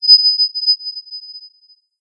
Warning: It can be loud, Adjust the Volume
This is definitely something sharp!
This sound can for example be used in films, for example if a person is about to stab the main character from behind - you name it!
If you enjoyed the sound, please STAR, COMMENT, SPREAD THE WORD!🗣 It really helps!
cinematic, creepy, cutscene, danger, deadly, film, game, horror, knife, nightmare, sharp, sinister, spooky, suspense, threat